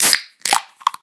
Opening Can
The sound of opening an aluminum can.
can, opening, recorder